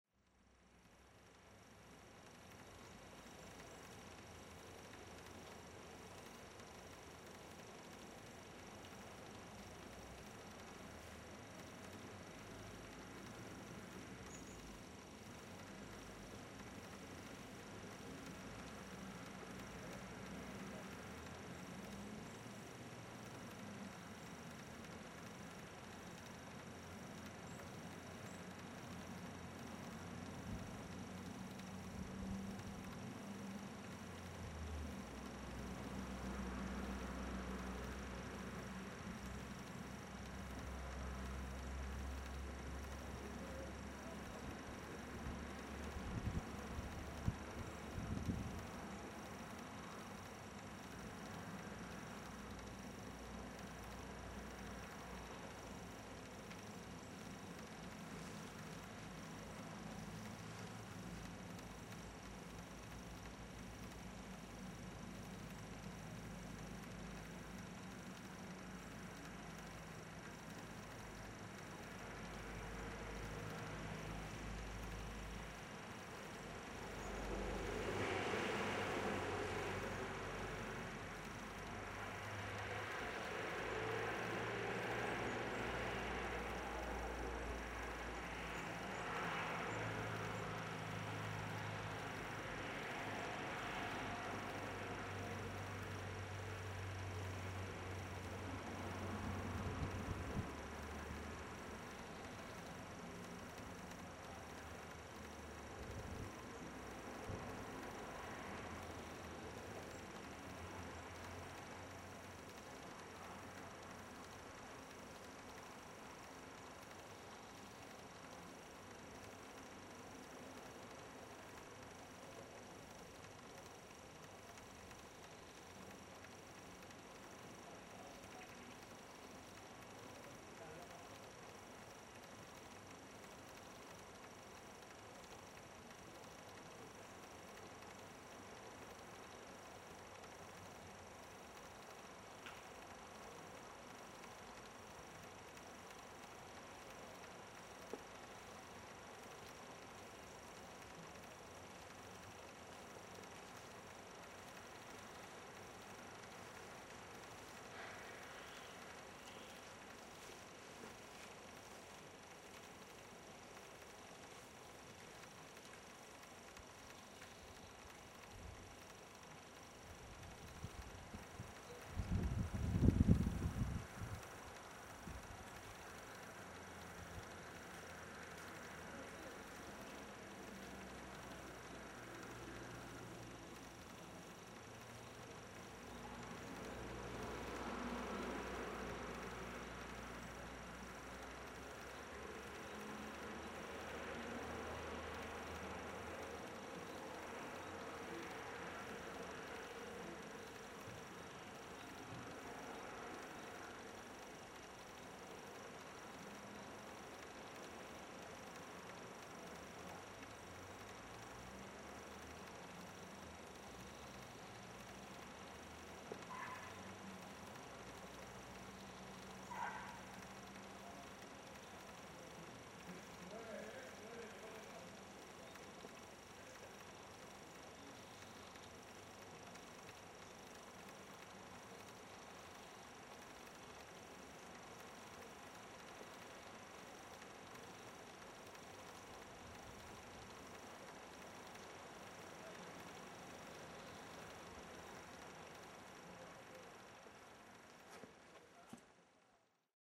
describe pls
21 08 08-20 00-Iglesia pequena
A very lonely chapel at the Montserrat mountains. It’s closed, very, very well closed. At the outside, silence and tranquility are the soundscape owners. Some bird is singing not very far away. From time to time, cars pass by the not so far road, but without making too much noise, as not wanting to break the magic silence of the place. Silence at the Montserrat.
catalonia, cecilia, chapel, montserrat, nature, outside, silence